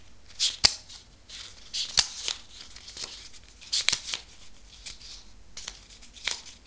paper book browsing
browsing, paper